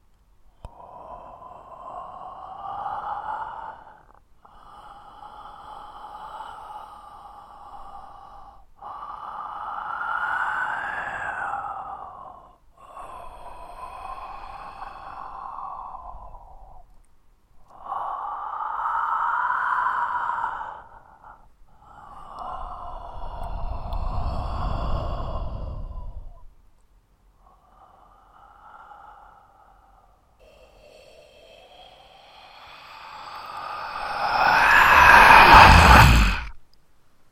Ghost Breath
Some horror sounds I recorded.
Thanks very much. I hope you can make use of these :)
breathing, creepy, creepy-breathing, disturbing, evil, ghost, ghost-breathing, ghostly, ghostly-breathing, haunting, horror, scary, scary-breathing